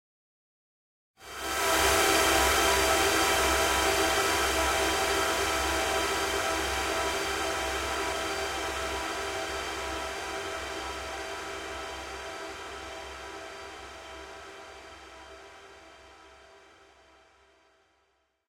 Ambient Downfilter, recorded in fl studio, with some instruments.